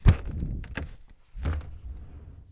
Balloon sequence - Zoom H2
movement, random